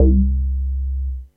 How Bass
another nice bass sound great for acid lines. created on my Roland Juno-106
synth, bass, hit, big, acid, house